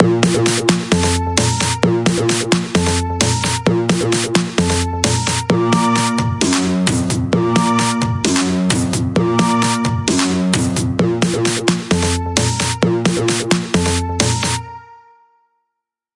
Verse Chorus Combo
131bpm Key F
Bass Keyboard Drum
15 seconds
Upbeat Tempo
Not required but if you use this in a project I would love to know! Please send me a link.
90s, Hip-Hop, Dance